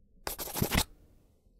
Pen on Paper 04
Pen on paper.
{"fr":"Raturer 04","desc":"Raturer au stylo à bille.","tags":"crayon stylo rature"}
scribbling, paper, striking